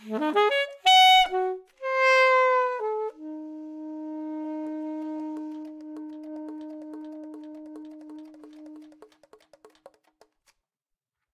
An atonal lick on the alto sax, ending with a long tone.